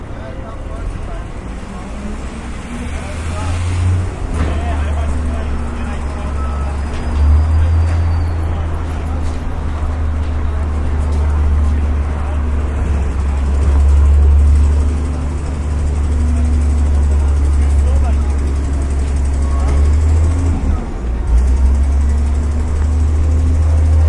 City Sidewalk Noise with Passing Refuse Truck
new-york, people, talking, truck